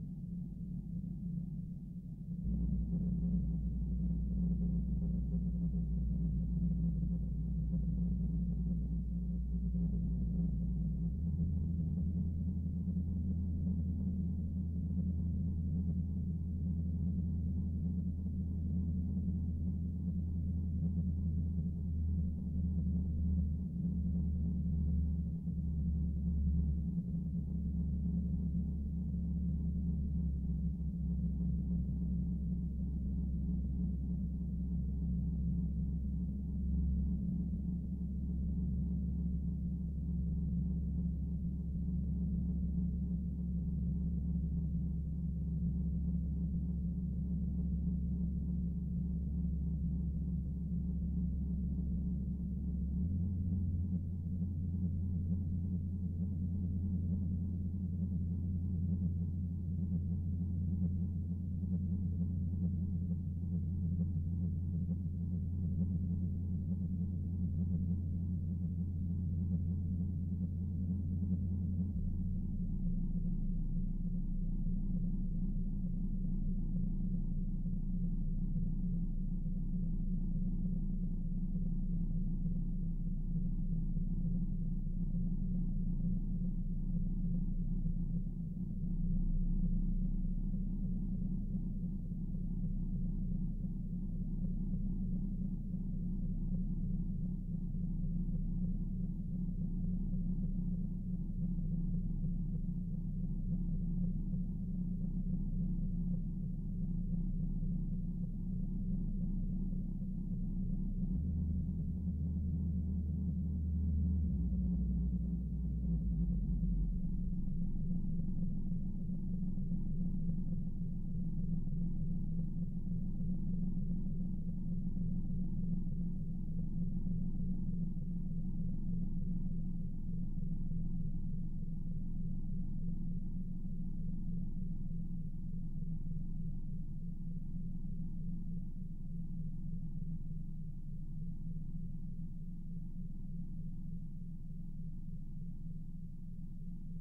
sh Space Machine 1
Developed for use as background, low-level sound in science fiction interior scenes. M-Audio Venom synthesizer. Long-running clip changes subtly over time.